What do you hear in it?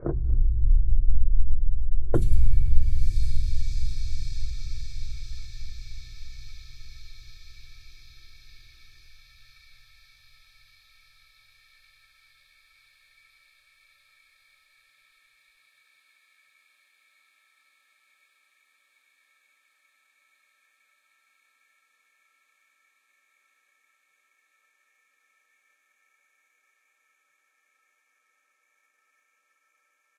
dark toms
percussion, sounddesign, toms